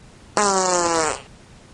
fart poot gas flatulence
fart, flatulence, gas, poot